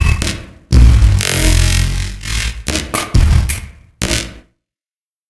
The sound of a stretched drum loop and synth patch recorded together and processed using granular synthesis.